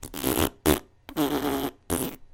Guy making fart noises with his lips. Recorded with SP B-1.
dump, fart, human